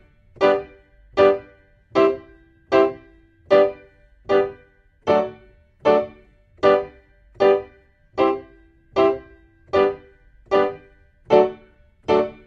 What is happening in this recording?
zulu 77 G PIANO 1
Roots rasta reggae